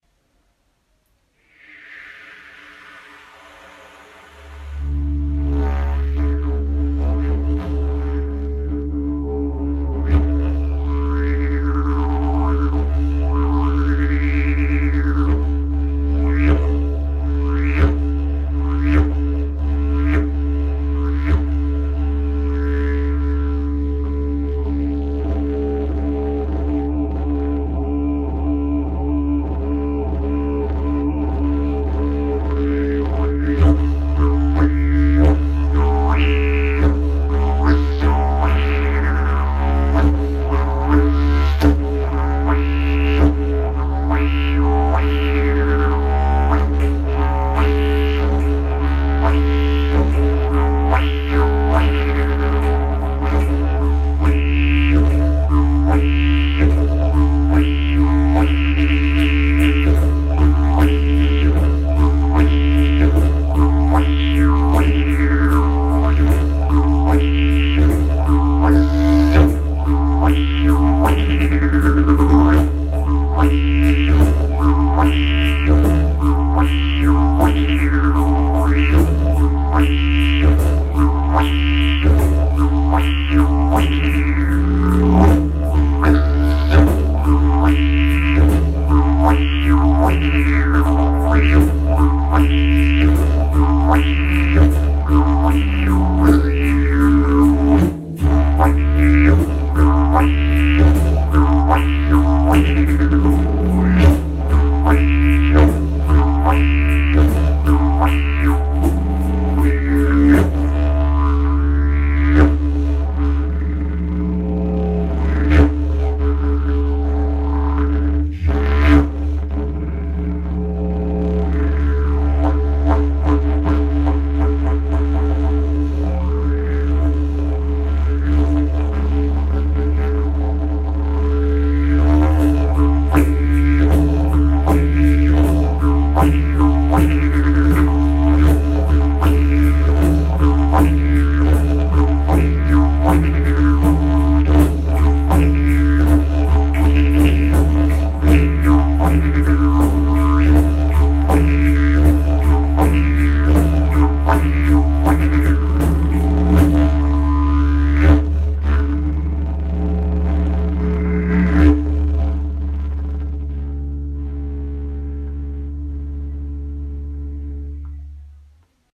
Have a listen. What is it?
Didgeridoo and shaker - D key
Playing a didgeridoo in D and other instruments at the same time.
And for more awesome sounds, do please check out my sound libraries.
didgeridoo, australia, shaker, didjeridu, tribal